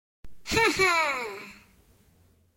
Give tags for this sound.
satisfaction; ha; toon; cartoon; cool; did; we

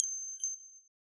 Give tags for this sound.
application; artificial; automation; bleep; blip; bloop; bright; click; clicks; command; computer; data; digital; electronic; game; gui; hud; interface; machine; noise; pitch; serum; sfx; short; sound-design; synth; synthesizer; windows

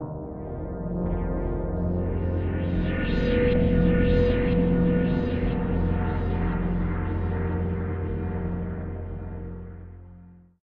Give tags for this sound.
texture; pad; lounge; chillout; ambient; downbeat; layered; sampler; synth; electronica

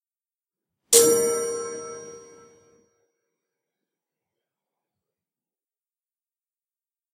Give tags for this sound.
grandfather; chime; hour; clock; chiming; chimes; time; clockwork; strike; chime-rod; grandfather-clock

